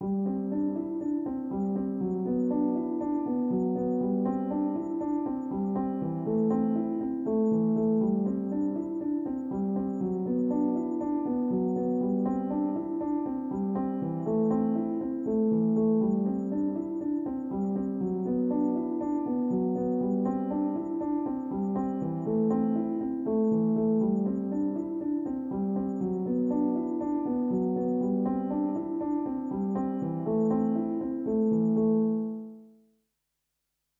Piano loops 033 octave down short loop 120 bpm
samples, loop, 120, Piano, simplesamples, simple, 120bpm